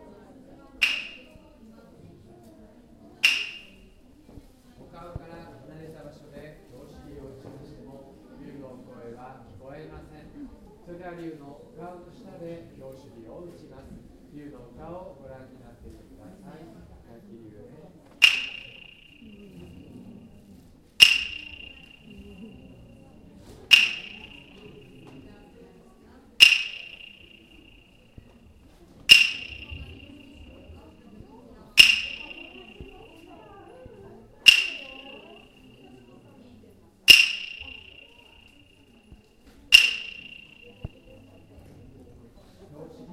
Echo in a Buddhist temple
Yakushi-dō Hall is in a Buddhist temple located in Nikko (Japan) where there is a big painting of a dragon on the ceiling. A monk strikes two wood blocks to demonstrate the peculiar echoe in the hall. It is said that sounds like the cry of a dragon.
Echo, Japan, Nikko, Temple, Wood